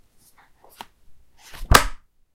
Book Close - 1
Closing an open book